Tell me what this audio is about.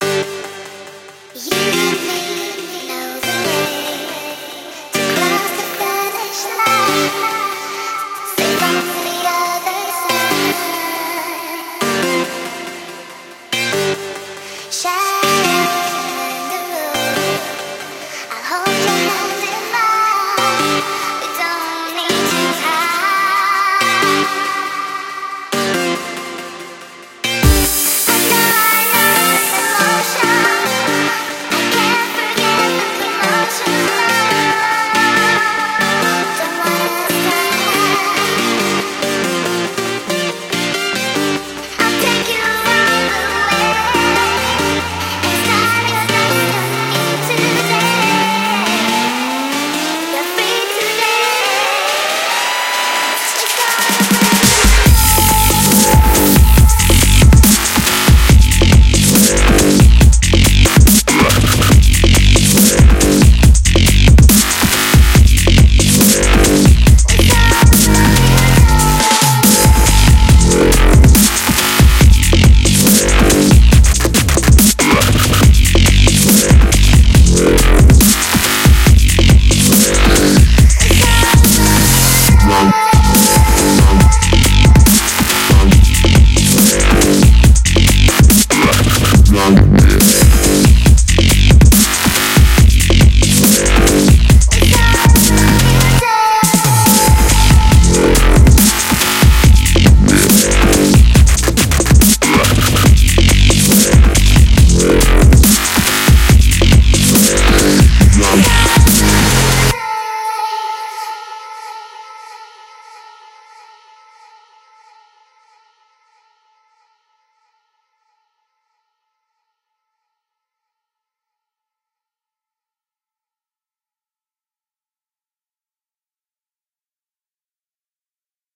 Dubstep Loop 1 (brand new day)
Melody, Bass, Dubstep